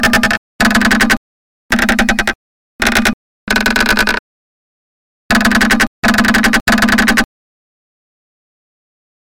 Std Browning M2, short shelling. Microphone taped on metal housing, thus most of the sound is not air waves but waves through metal construction.
military, gun, weapon, machine